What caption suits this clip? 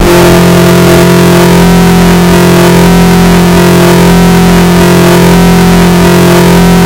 Distorted sin wave scream 4 [LOUD]
dark, distorted, distortion, gabber, hardcore, sine